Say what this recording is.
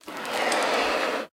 Short Sound of the Bellows of a Concertina
short recording of the pumping bellows of my concertina.
back-ground, organ, concertina, street-music, air, accordion, accordeon